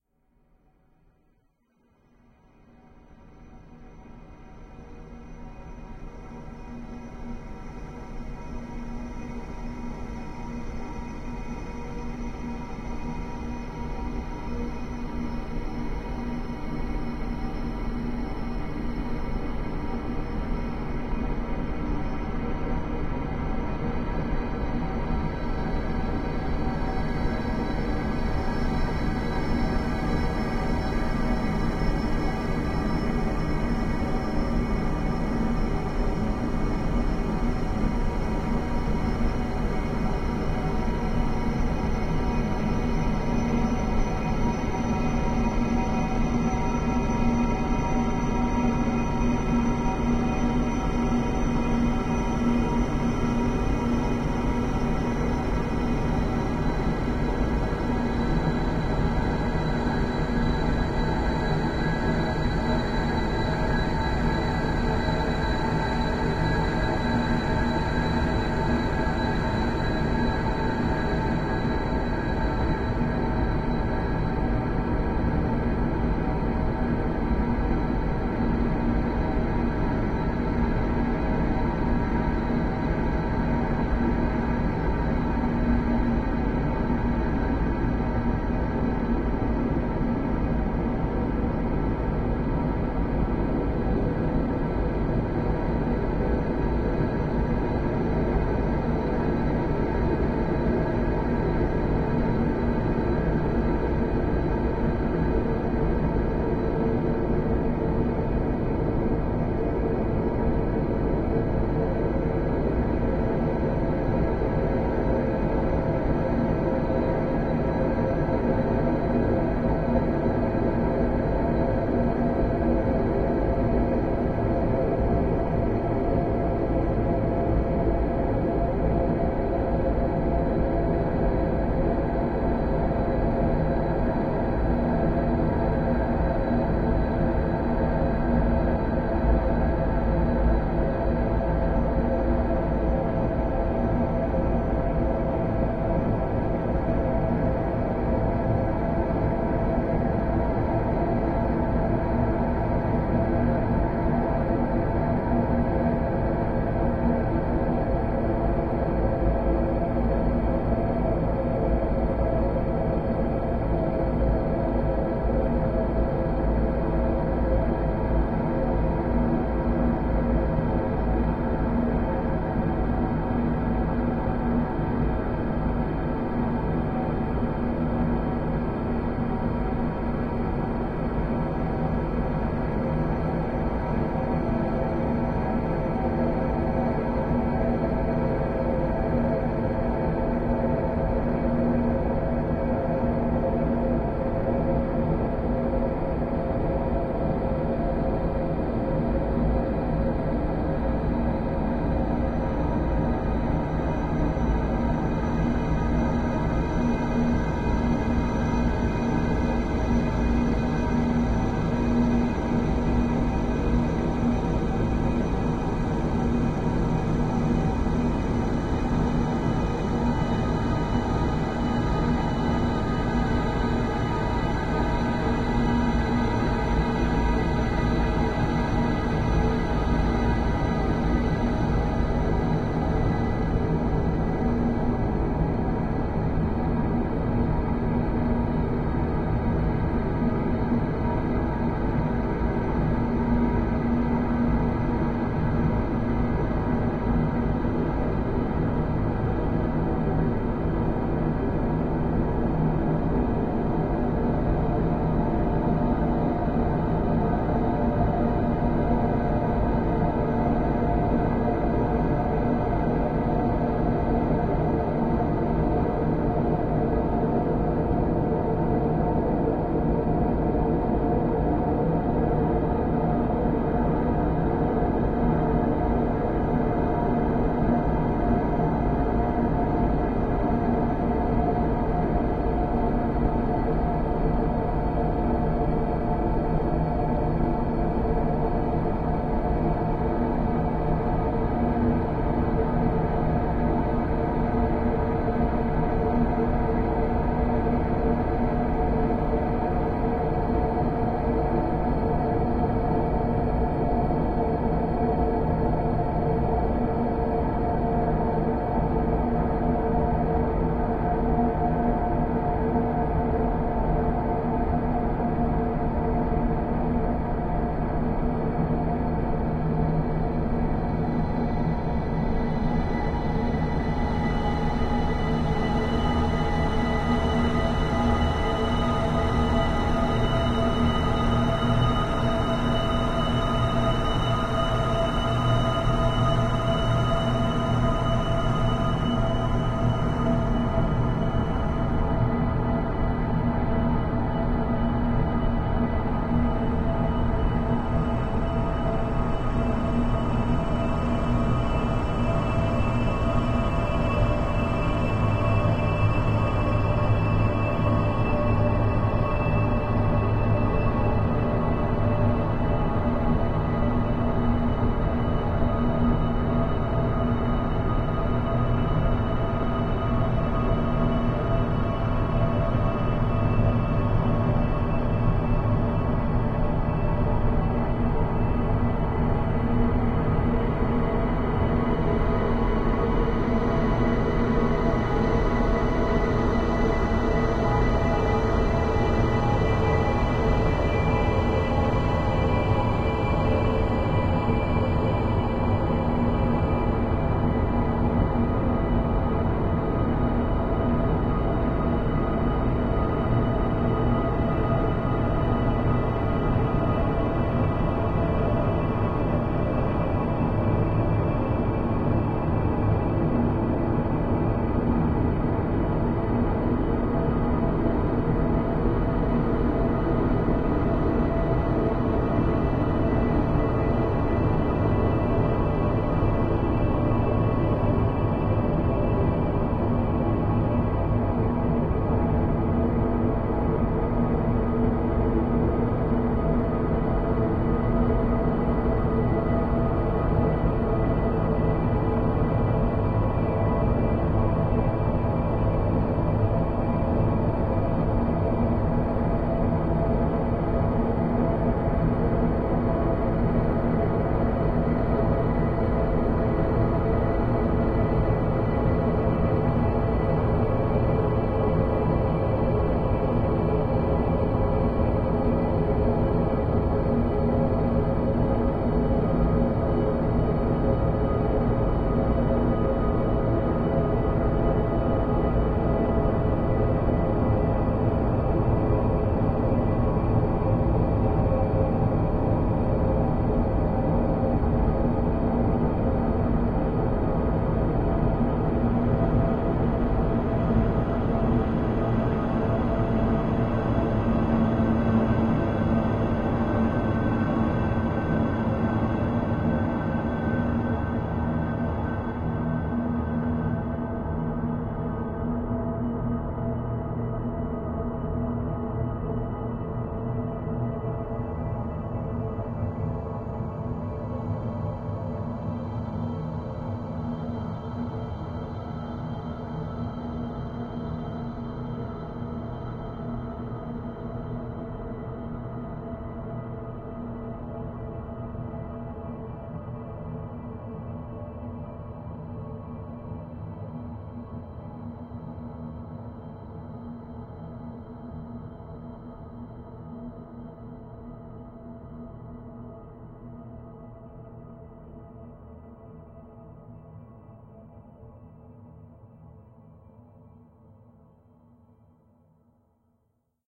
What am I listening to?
Dark Ambient 016.
Dark, ambient, soundscape, atmosphere, atmos, ambience, background, background-sound